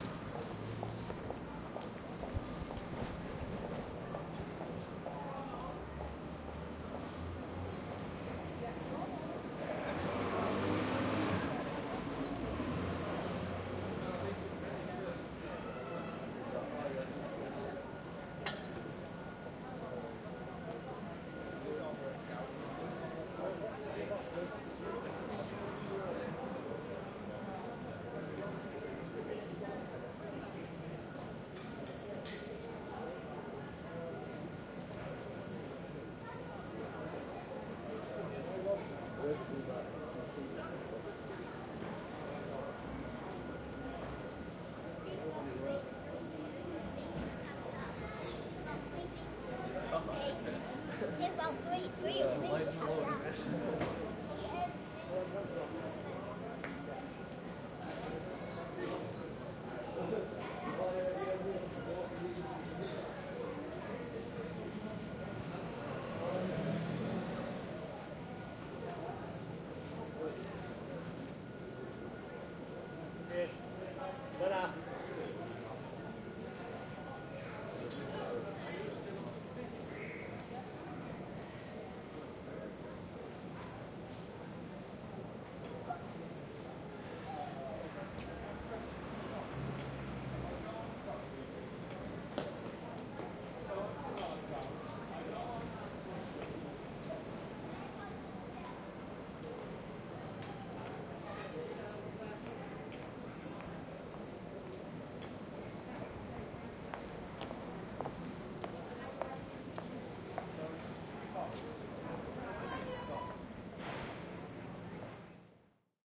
Huntriss Row in Scarborough on an average day.- Recorded with my Zoom H2 -